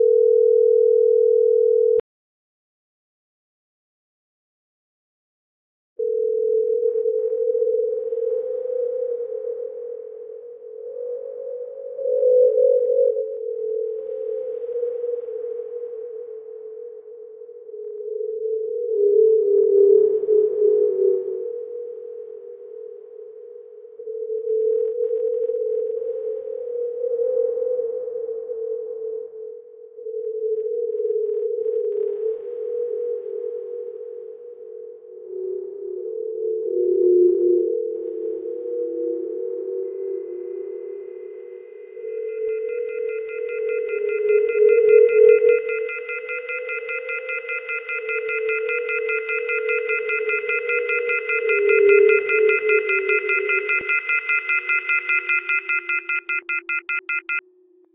Just a creepy phone sound... as if you dialed a number and your brain was sucked into the phone world for a moment... then you woke up to line disconnect sound.
creepy, phone